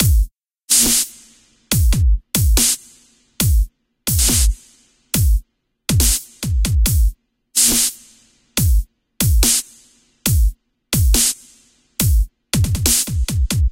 Stereo DUB
Made with user deerob mixed with Waves Platinum in ACID Pro7.0.
140BPM msec conversion = 107.14,214.29,321.43,428.57,857.14,9.333,2.333,0.583
140, ambient, beat, bpm, brostep, DnB, Drum-and-Bass, Dub, DUBSTEP, edm, glitch, house, loop, minimal, sequenced, tech, trance, trap